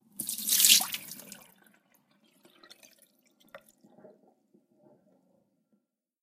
Water emptied into metal sink. then it goes down the drain with a slight gurgle noise. Recorded with a 5th-gen iPod touch.